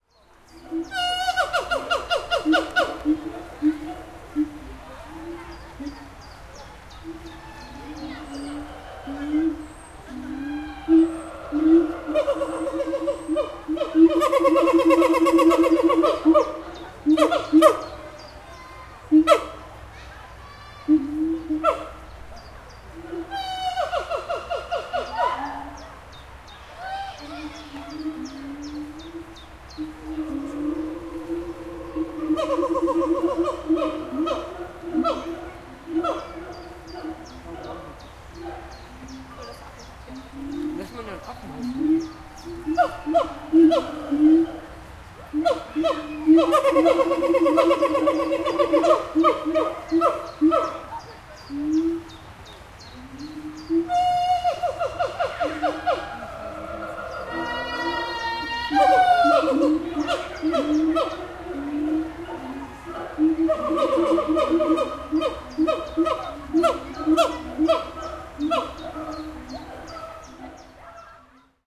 2012 05 2012 Siamang gibbon
Rutting cries of a group of Siamang gibbons in the Duisburg zoo, Germany. Very loud and impressive. Zoom H4n